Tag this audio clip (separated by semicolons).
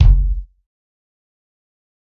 punk; tonys; dirty; tony; kit; pack